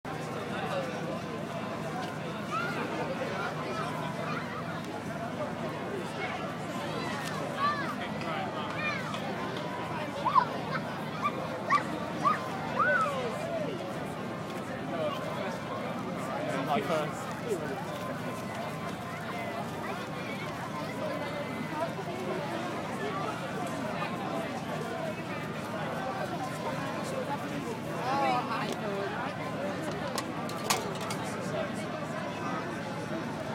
Public Place Children Bells
open public place with children and church bells
field-recording, people, soundscape